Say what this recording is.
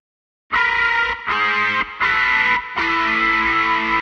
Guitar distor 3
another loop with my guitar this time with distorsion. Edited with Logic.